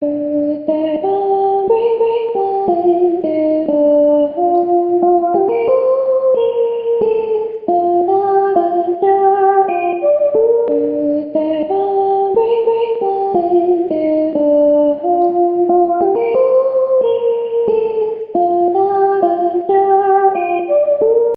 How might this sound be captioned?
Blip Female Vocal Chops
My 100th sound :). New vocal chops, sung by me and edited together. Rather low quality sounding, but that's on purpose. Slight reverb and EQ.
female, voice